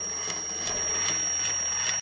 recordings from my garage.
industrial, tools, metal, machine